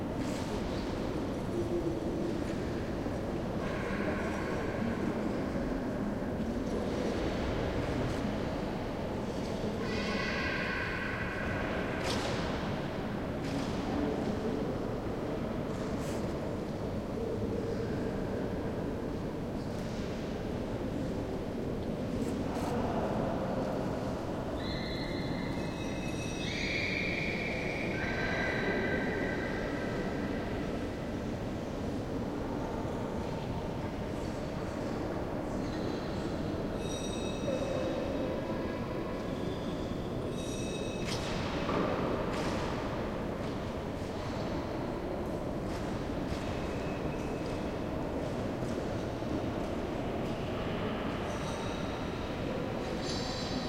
mbkl entrance mid
ambient recording of the main foyer of the "museum der bildenden künste" (museum of art) in leipzig/germany, taken from next to the ticket desk, approx. 10 meters from the entrance.footsteps and voices of visitors, a child shouting and large doors swinging.this file is part of the sample-pack "muzeum"recording was conducted with a zoom h2 with the internal mics set to 90° dispersion.